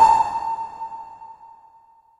jillys sonar
Sonar sound made with granulab from a sound from my mangled voices sample pack. Processed with cool edit 96.